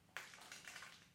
Dents-Crachat
Spiting after brushing the teeth recorded on DAT (Tascam DAP-1) with a Rode NT4 by G de Courtivron.
spiting, brushing, teeth, bathroom